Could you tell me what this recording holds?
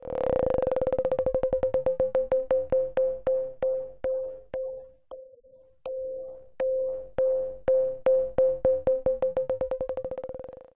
FX Sine Bounce Phased Panned 001
A simple sine wave modulated using an LFO on its amplitude, with the frequency of that LFO in turn being modulated by an envelope. The sound is panned and also phase shifted to alter the spacial presence as it moves.